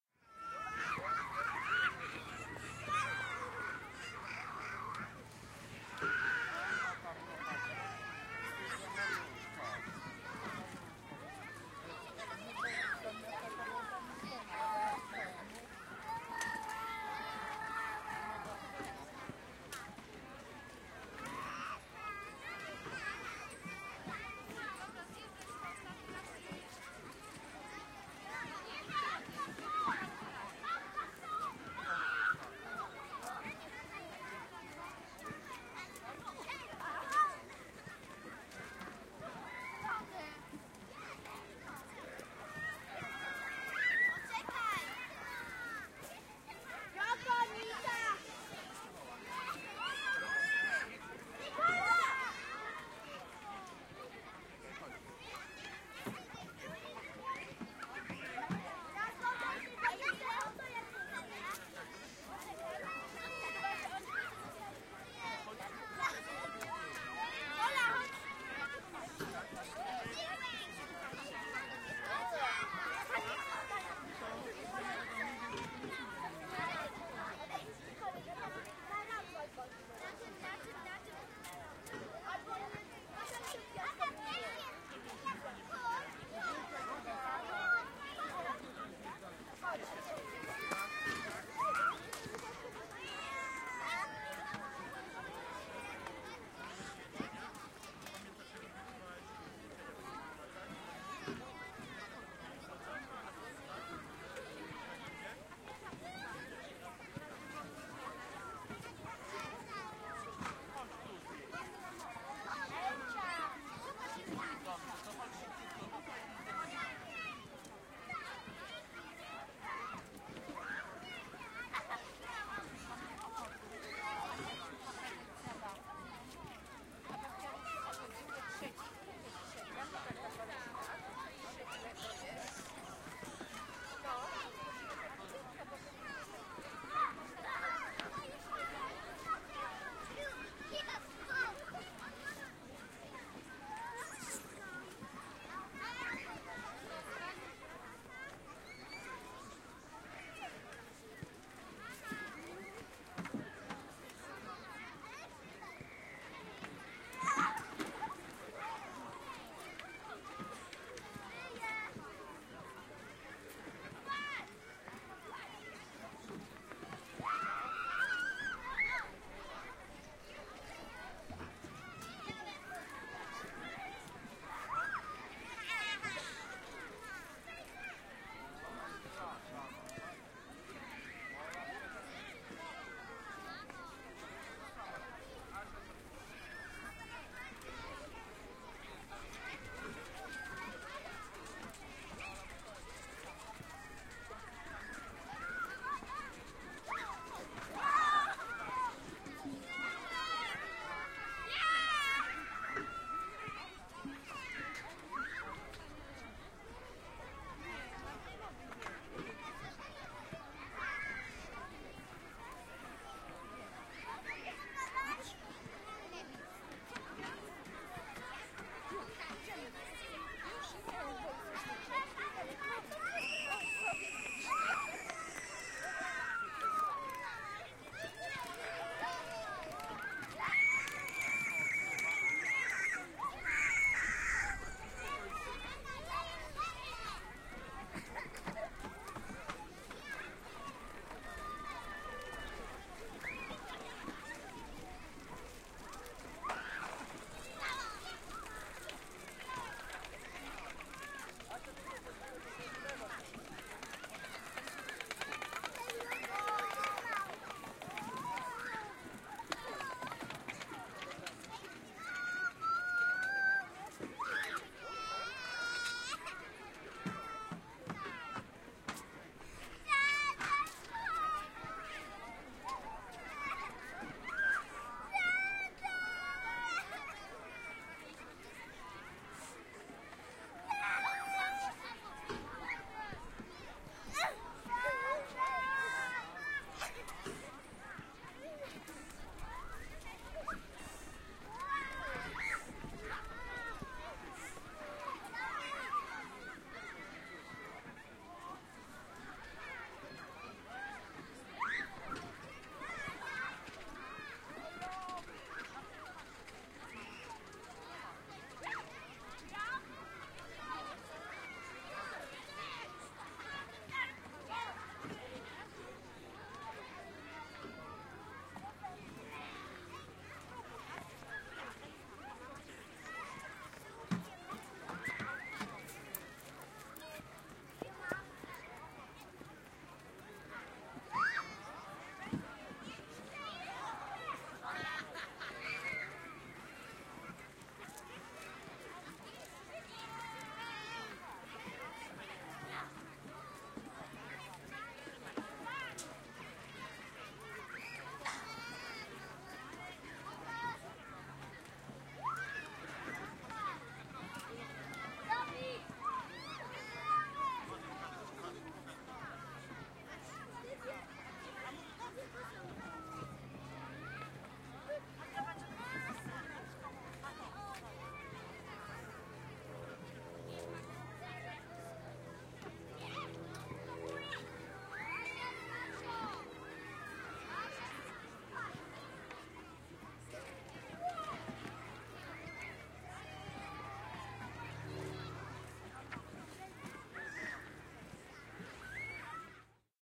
Targowek-Plac-zabaw
PL: Nagranie wykonane na placu zabaw na Targówku w Parku Bródnowskim około godziny 15 - 9 marca 2014 roku
ENG: Recorded at the playground in the park Targowek Bródnowski about an hour 15 - 9 March 2014